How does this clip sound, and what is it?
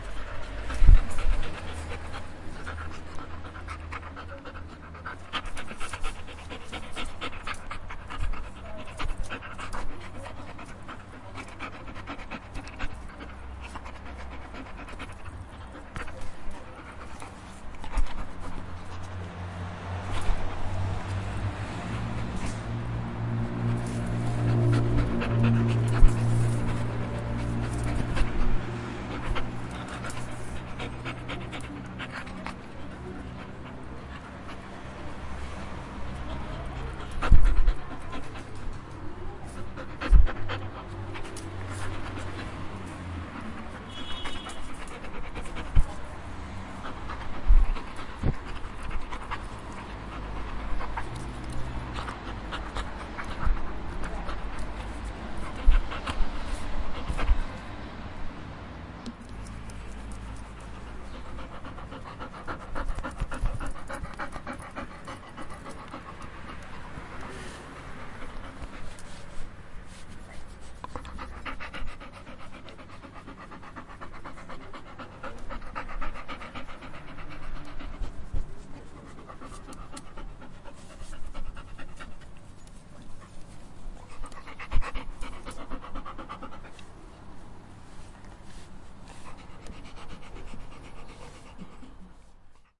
DOG BREATHİNG
Dog Breathing on the street,
Zoom H4N
animal, dog, street, pet